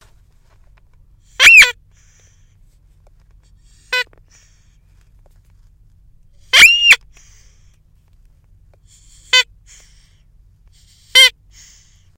squeeky toy recorded
air, squeek, toy